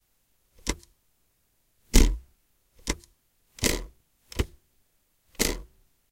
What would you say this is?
indicator stalk
The sound of the indicator "stalk" being clicked. This is a single sound intended for use as foley in a larger soundfield - perhaps of a person driving a car around town. See also "trafficator_cabin" and "trafficator_close" for sounds of the warning ticker relay.